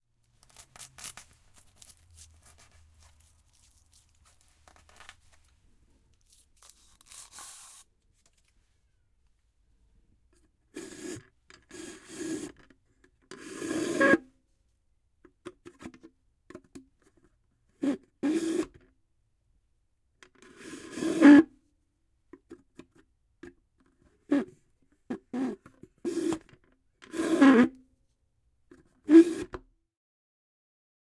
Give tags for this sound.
OWI Straw Plastic Cup

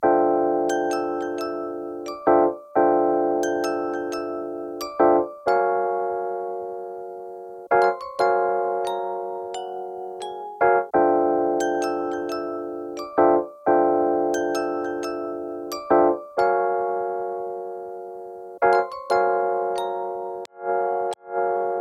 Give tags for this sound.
88 bpm chill hiphop jazz lo-fi lofi loop loops melody music nostalgic pack packs piano pianos relaxing sample samples sound Vibes

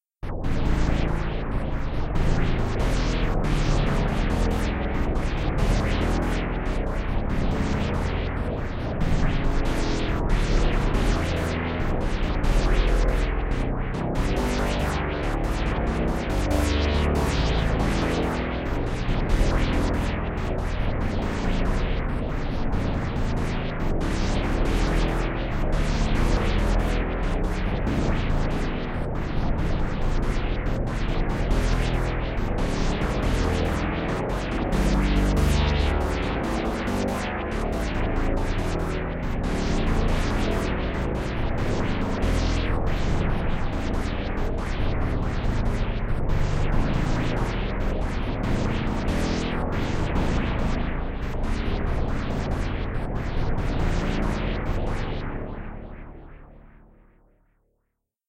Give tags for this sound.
bpm-140 techno

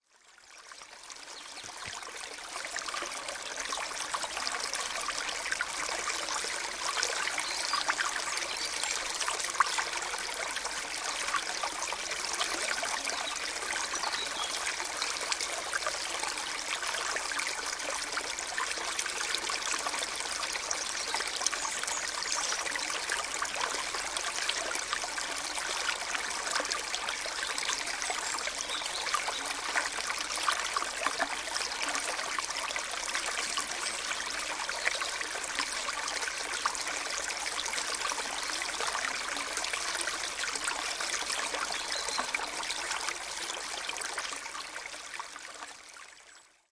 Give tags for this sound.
canal field-recording relaxing river stream water

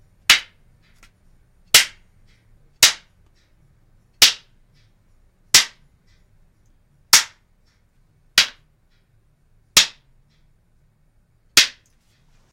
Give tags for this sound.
clapper board click

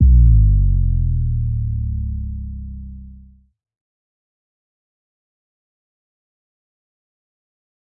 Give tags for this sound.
hit sub impact

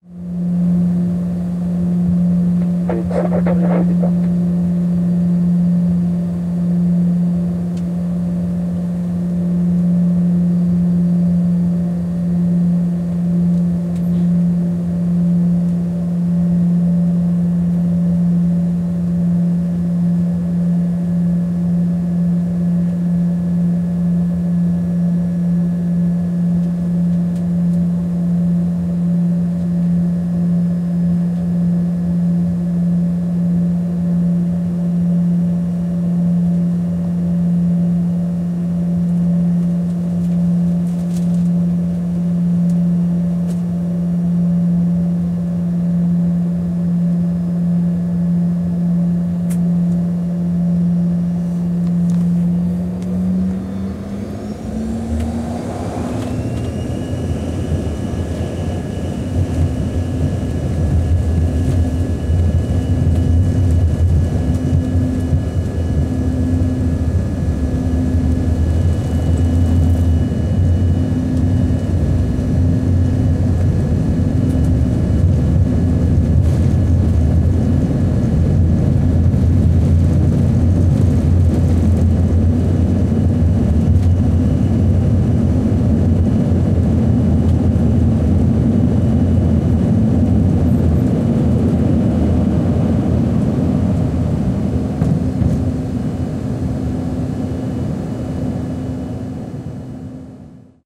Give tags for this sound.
take-off airplane jet

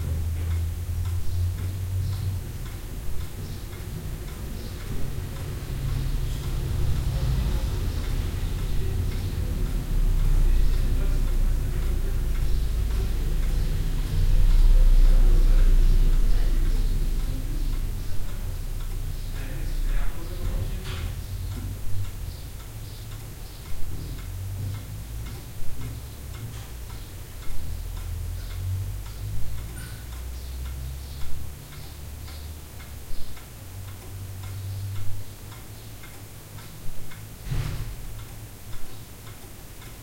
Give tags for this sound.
clock room

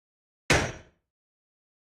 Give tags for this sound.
war weapon shot gunshot military firing army rifle gun pistol shooting

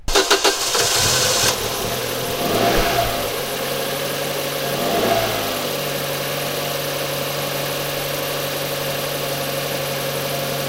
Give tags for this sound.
car car-engine car-engine-ignition car-engine-start cars driving engine engine-ignition engine-start ignition road start starting street traffic turn-over turning-over

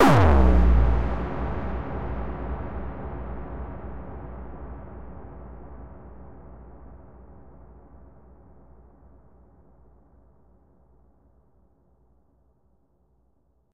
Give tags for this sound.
bassdrum big boom hardcore reverb

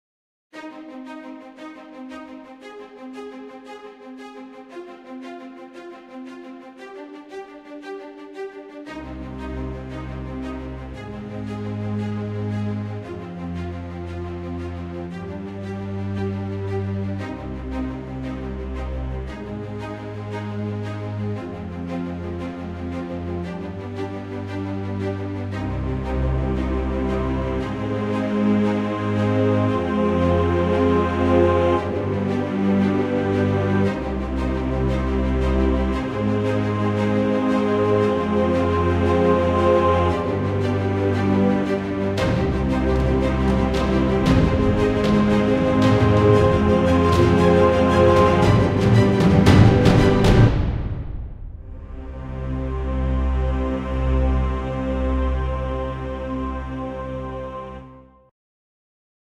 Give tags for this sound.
epic trailer song movie epicmusic soundtrack

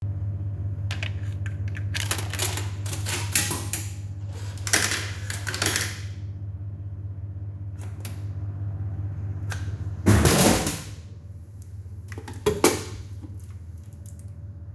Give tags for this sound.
button buzz can coin coins coke crash drop fridge hit hum machine mechanical vend vending vending-machine

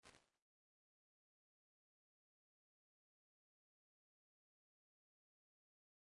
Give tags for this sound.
convolution FX impulse-response IR

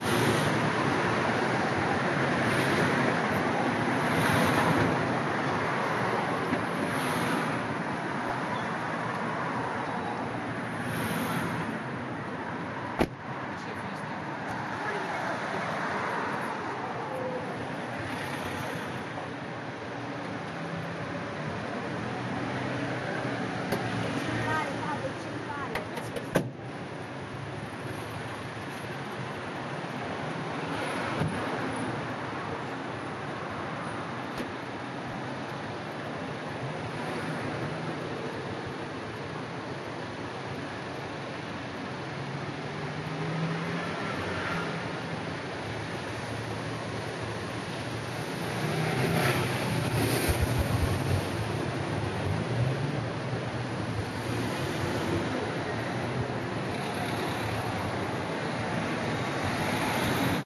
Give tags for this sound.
ambient ambient-noise background background-noise car city firenze florence noise

